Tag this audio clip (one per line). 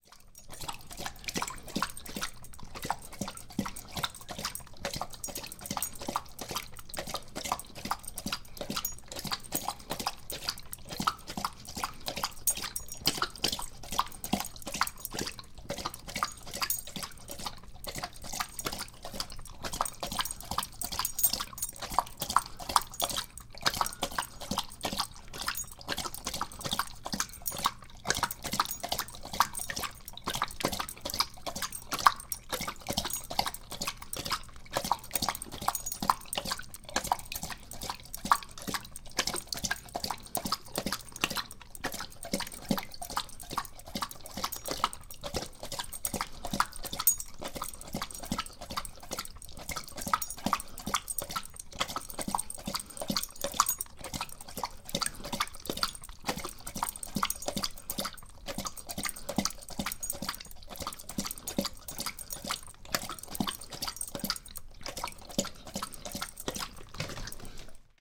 bark
dog
growl
howl
husky
malamute
moan
sled-dog
wolf